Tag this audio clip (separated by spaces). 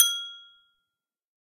melodic note